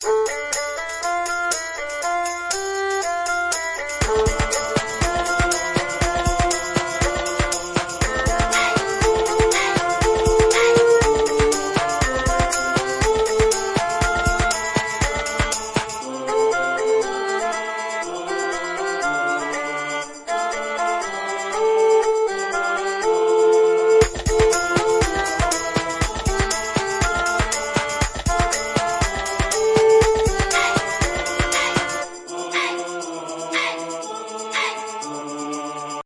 The first thing I've made exploring garageband! The melody is taken from the Yiddish folk song "Ale Brider/Un Mir Zaynen Ale Brider" (All Are Brothers/And We are All Brothers). I hope you like it!
120-bpm, 120bpm, ale-brider, all-are-brothers, beat, choir, d-minor, erhu, folk, garageband, hasidic, jewish, leftist, loop, melody, nigun, nigunim, percussion, polish, rhythm, rhythmic, tamborine, yiddish